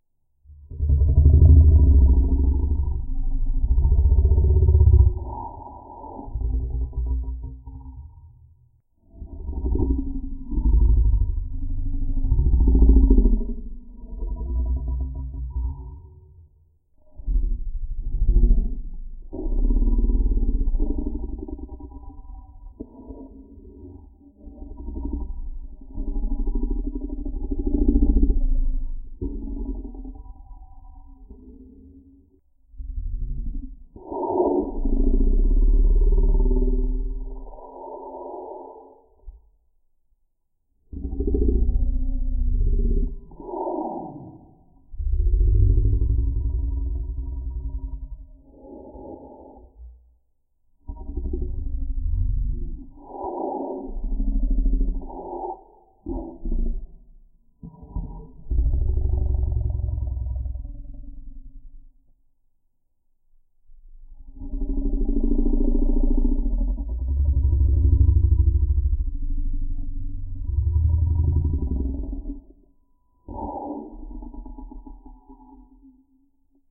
Lion Growling, Roaring and Breathing. Good Sound.
Echos, Growl, Growling, Growls, Lion, Lion-Breathing, Lion-Growling, Lion-of-Judah, Lion-Roaring, Roar, Tiger